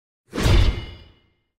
Weapon, Sword, Metal sword, Swing, fast, impact.
Handle, Swing, Sword, Weapon